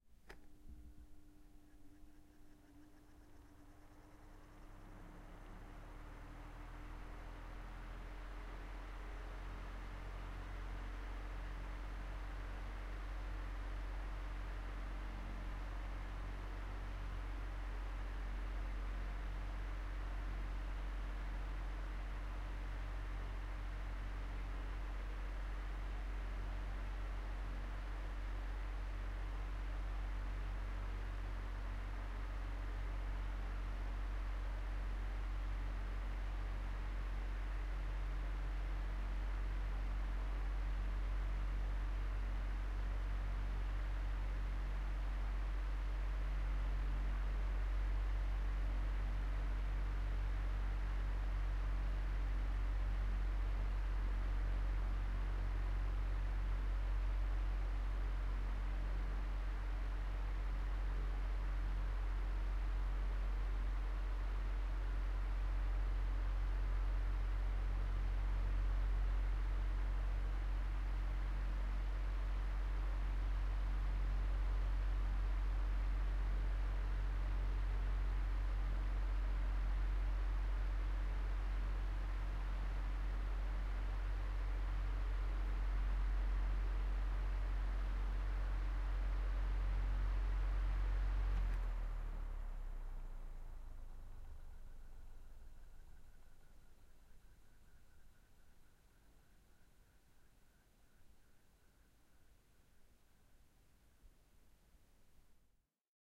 Fan, setting 1
A fan blowing, set to the lowest setting. The recording was made from behind the fan so the air wouldn't blow into the mic directly.
ac, air, air-conditioning, blowing, fan, Fans, foley, vent, ventilation, ventilator, wind